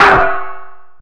Techno/industrial drum sample, created with psindustrializer (physical modeling drum synth) in 2003.
percussion, drum, synthetic, industrial, metal